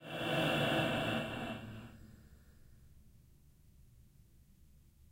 transition between two clips
transition, between, edit, clips, going, dark, two